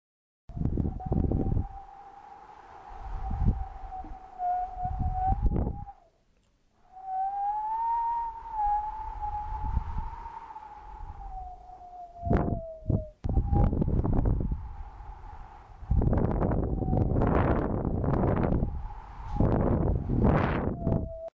Sound of cold wind.